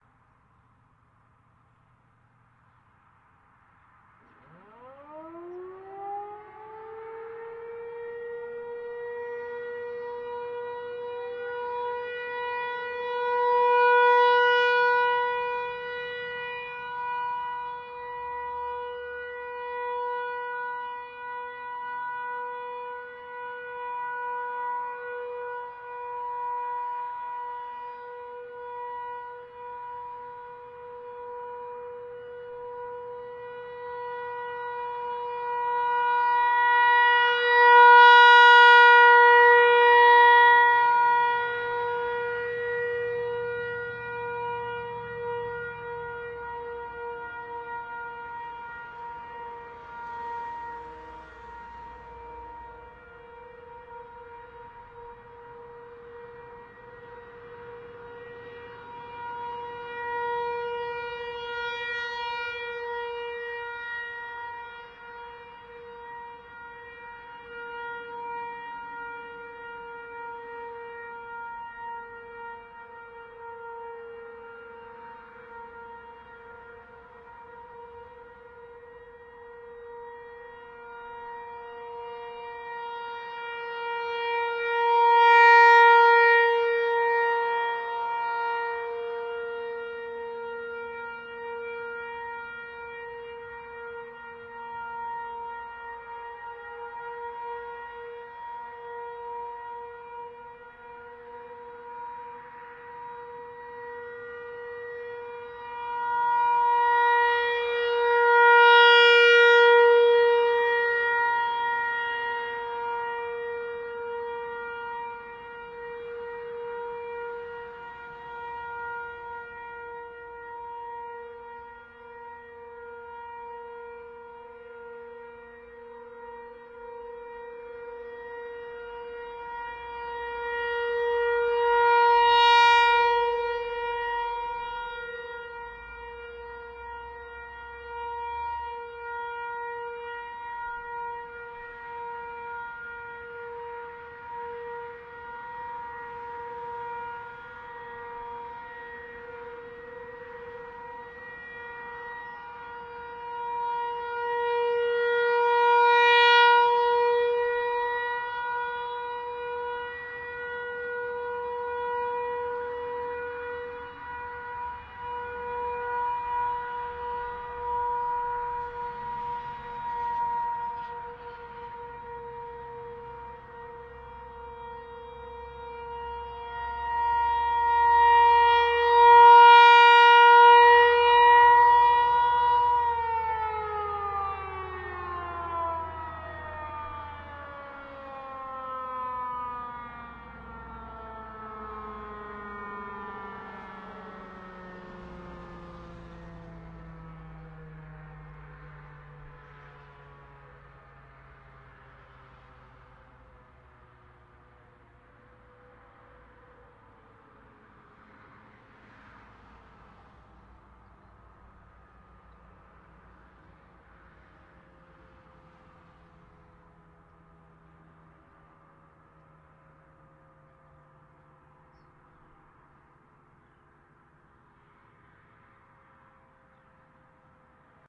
Siren in distance 01
Warning siren from a distance, traffic and wild life in background.